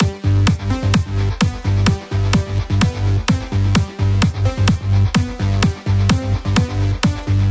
EDM Loop
128-BPM, Dance, EDM, G-Major, Loop, Music